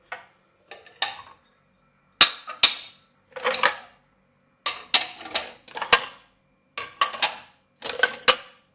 20060727.kitchen.crockery
sound of dishes being piled. Sennheiser ME62 > NZ10 MD
china, kitchen, plates